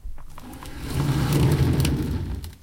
A wheeled chair rolling across a wooden floor.
rumble, chair, scrape, roll